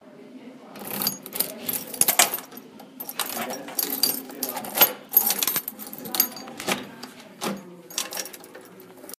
Key In Door
This is the sound of a key on a keyring entering a lock on a wooden door and unlocking it.
keyring, door, unlock, field-recording, front-door, locking, key, opening, close, keychain, jingling, unlocking, closing, lock, keys, open